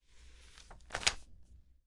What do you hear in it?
06. Paso página libro
pass of page
page, book